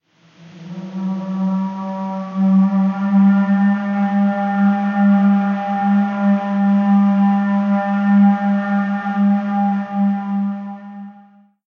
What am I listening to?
A ominous horn like sound.
art, fx, hollow, horn, jcgmusics, minimal, ominous, processional, sound